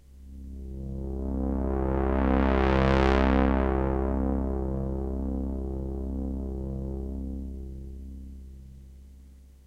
Rase and Fall
sweeping pad sound created on my Roland Juno-106
evolving, ambient, soundscape, artificial, pad, dreamy